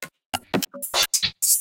A pack of loopable and mixable electronic beats which will loop at APPROXIMATELY 150 bpm. You need to string them together or loop them to get the effect and they were made for a project with a deliberate loose feel.